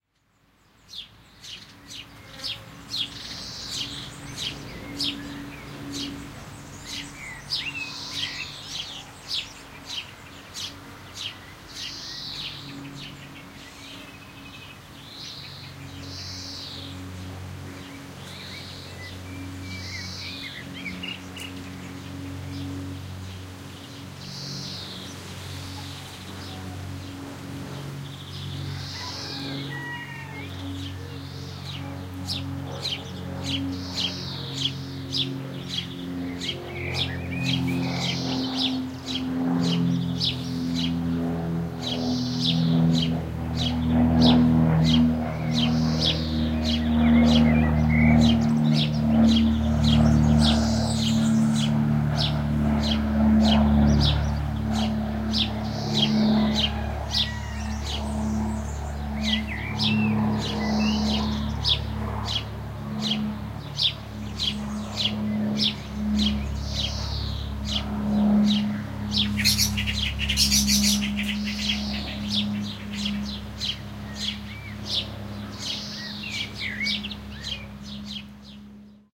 Overflying airplane on a background of bird chirps (Starling, House Sparrow, Blackbird). Recorded using Sennheiser MKH60 + MKH30 into Tascam DR-60D MkII - sensitivity set to 'high' -, decoded to mid-side stereo with free Voxengo MS plugin